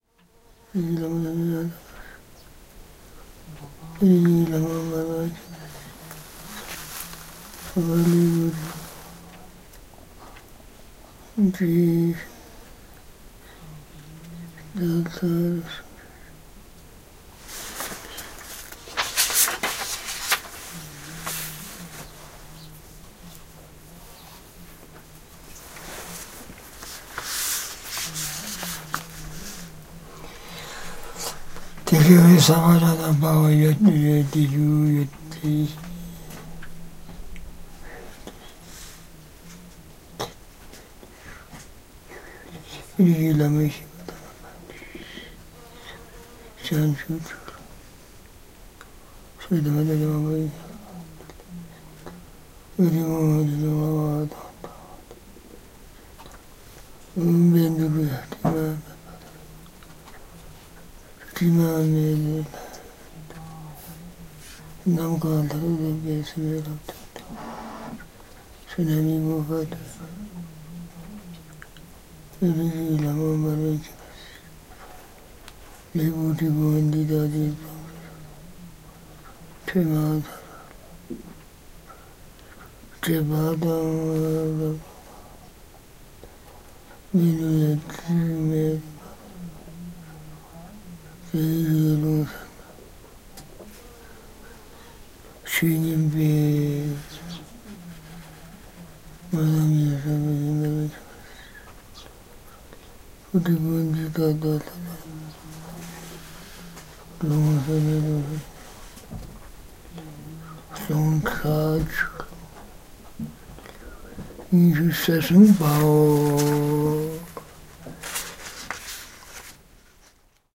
20070918 143246 IndiaZanskarThonde GrandFatherRecitingSutras
Field recording at the family house near Thonde Zanskar, India. Recorded by Sony PCM-D1.